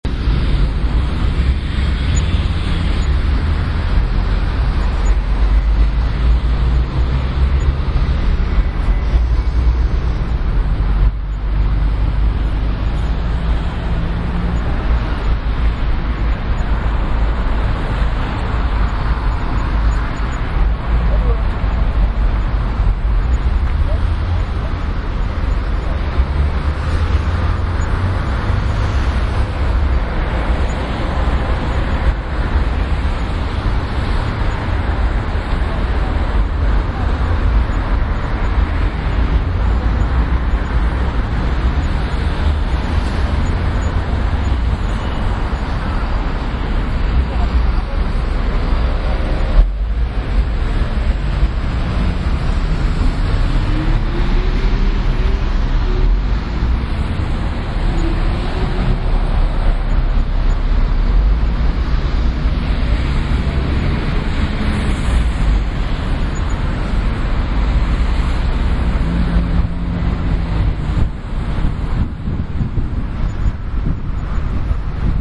Elephant & Castle - Middle of roundabout